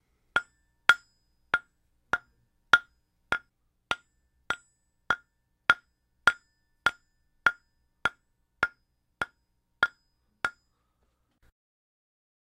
golpeando madera
golpera una madera con una roca
cali, diseo-medios-interactivos, estudioaudio-technica, golpeando, golpear, madera, rocadmi